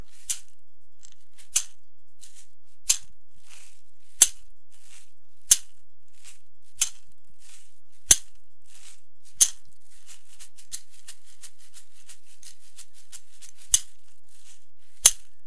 shaker recorded in a bedroom
raw sound, directly from the mixer, no EQs, comp, or FX of any kind